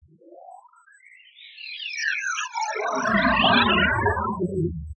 Whispering alien sound created with coagula using original bitmap image.